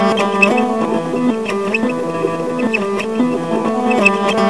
Pinko Trance
This loop would be really good for a Trance song or something. I'd like if you tell or show me what you do with this, I like this one.
dance, fast, guitar, loop, trance